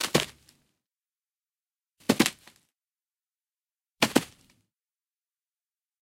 Falling Grass TwoStep
Foley recording of falling on grass with shoes on. Two footsteps can be heard on impact.
Thud, fall